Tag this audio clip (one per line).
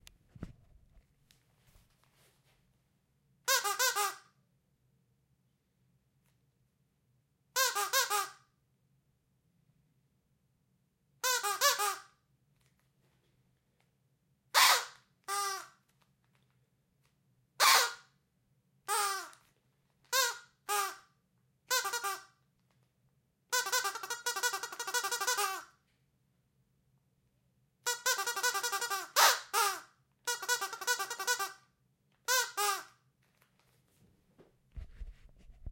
Squeaky
Toy
Dog